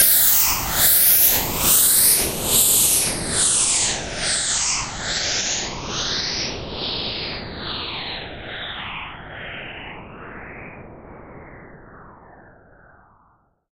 Noise Falling 02
A noise falling.
Ambiance, Ambience, Ambient, AmbientPsychedelic, FX, Falling, Noise, Processed, Sci-fi, Trance